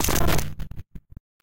Viral Noisse FX 01